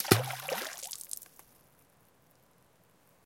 Tossing rocks into a high mountain lake.
percussion, splashing